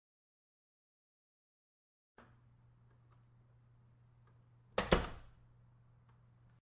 Door Slam - This is the sound of a door slam.